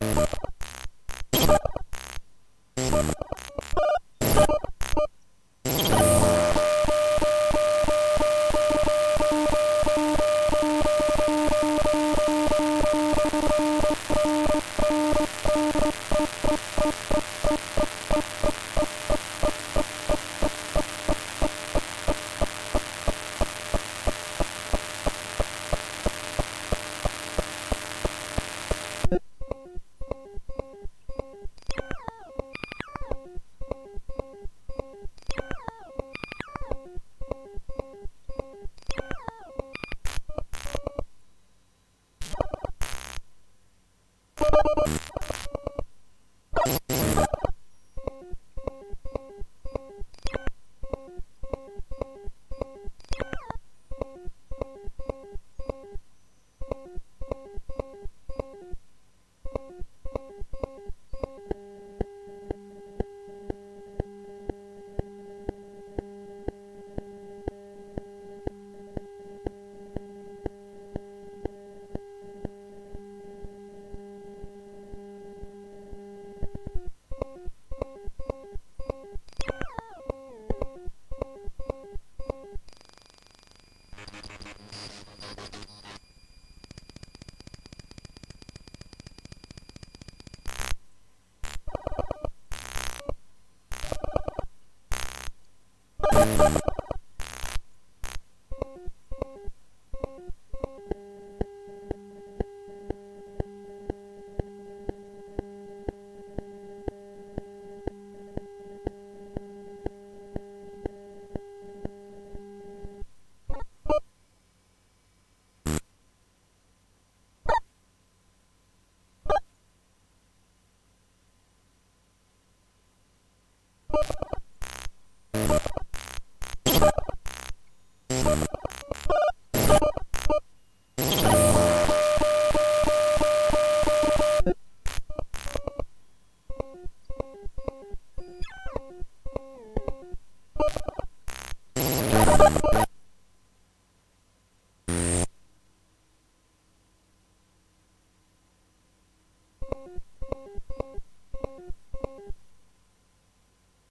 Sound of raw data from a random file, processed with an old DAW. Edited with Audacity (converted to stereo).
binary, bleep, code, computer, data, digital, dos, electronic, emi, file, loading, noise, pc